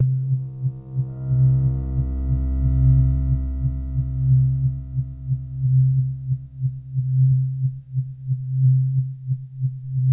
C2 This is a multisample of a wobbly sweeping patch i made with my SY35 a few years back. Interesting rhythmic textures are created when several notes are held together
lfo, pad, rhythmic, sweep, synth, texture, warm